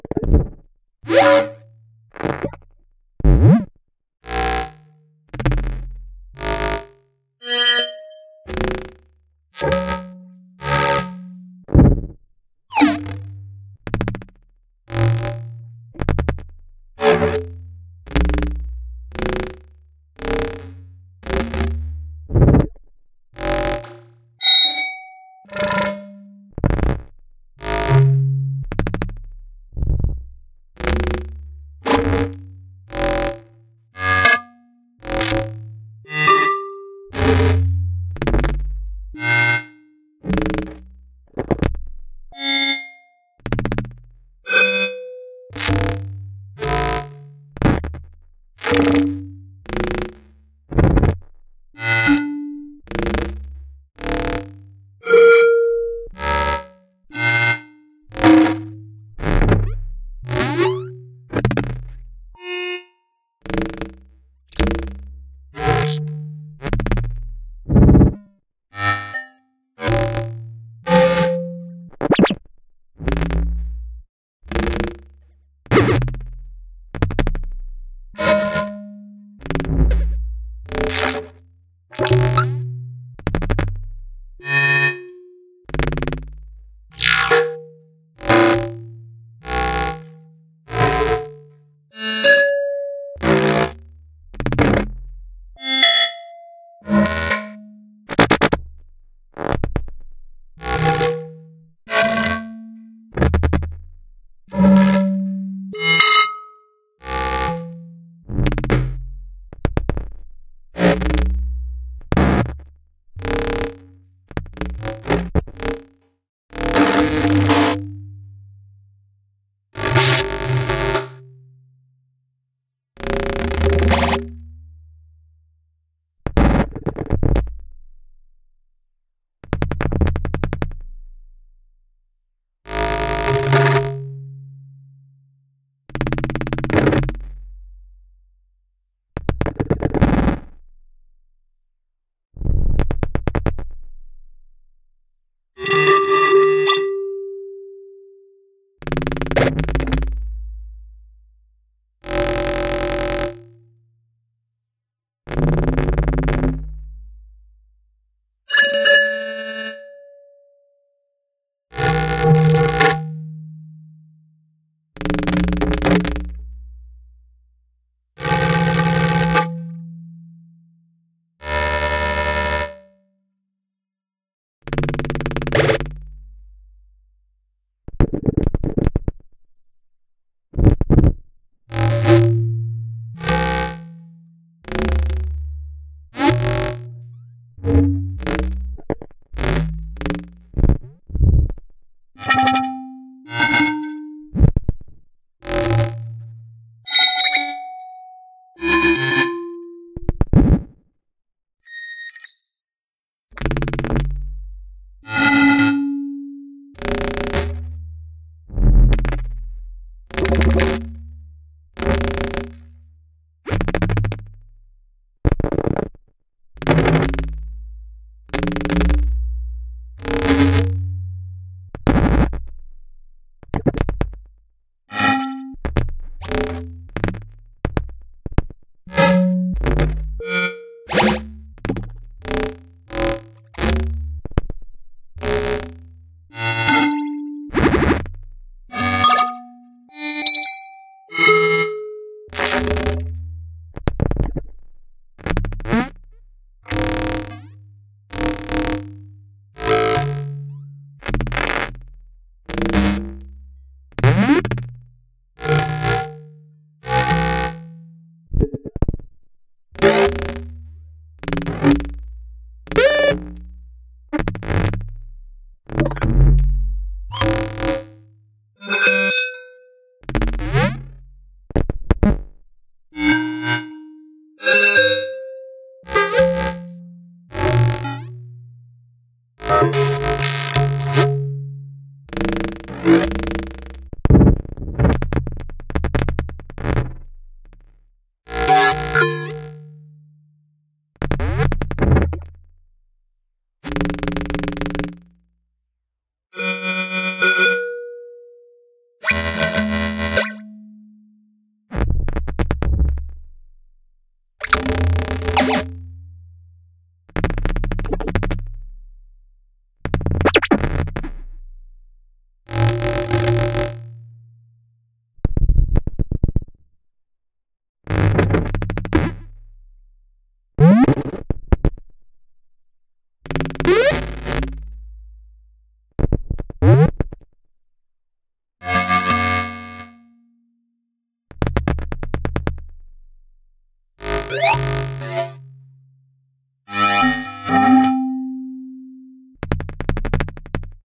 Generative UISciFiSource Practice20220417
Retro sci-fi one shots made in VCV rack.
UI, abstract, digital, effect, electric, electronic, future, fx, glitch, sci-fi, scifi, sfx, sound-design, sounddesign, soundeffect, synth